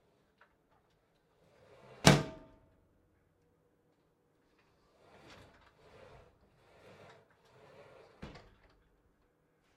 Window Moving
Window opening and closing sliding up and down
slam, up, window